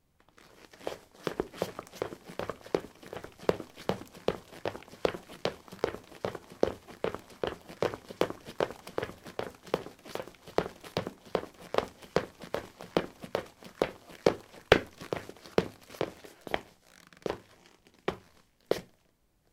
lino 18c trekkingboots run
Running on linoleum: trekking boots. Recorded with a ZOOM H2 in a basement of a house, normalized with Audacity.